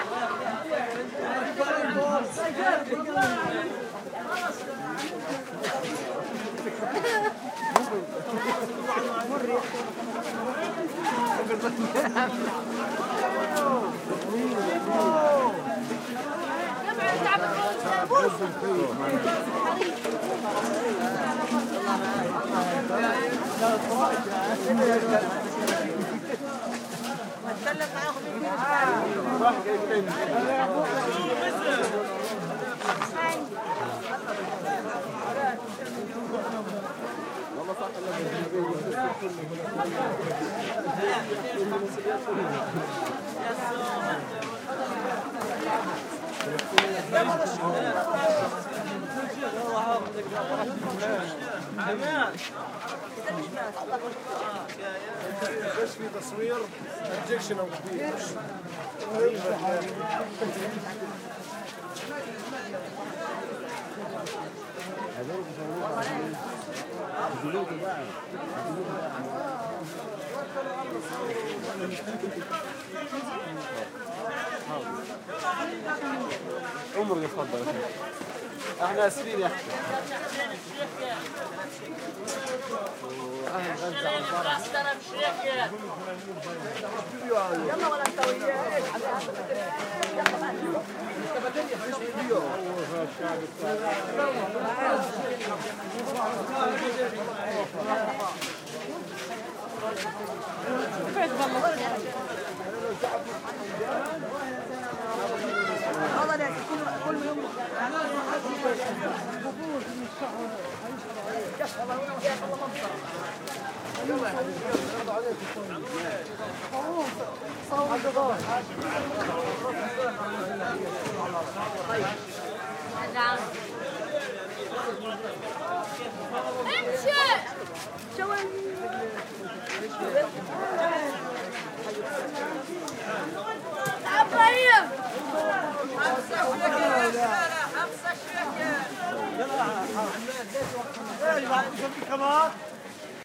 market ext mono Palestine children teenagers arabic voices sandy steps market activity1 busy Gaza 2016
arabic, children, ext, market, Palestine, sandy, steps, teenagers